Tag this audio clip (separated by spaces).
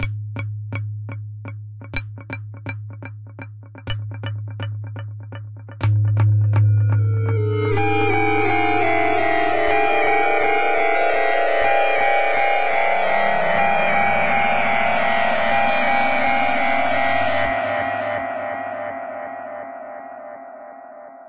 ambience atmos atmoshpere background chimes fall general-noise rise soundscape synthesized synthesizer